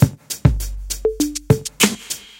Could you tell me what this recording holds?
l4dsong loop intro
bass, drum, hiphop, loop, rythm, samples, tr808